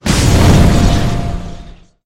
Dark Energy exp
The explosion of the build up
fight, energy, space, dark, war, alien